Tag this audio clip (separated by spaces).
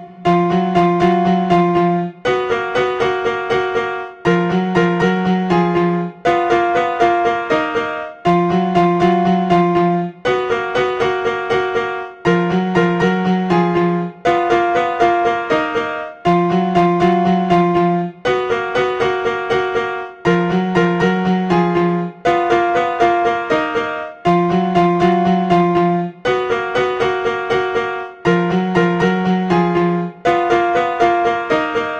ditty annoying